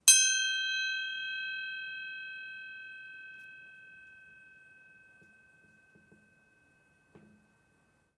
A single beat of a bell.
See also in the package
Mic: Blue Yeti Pro
bell, ring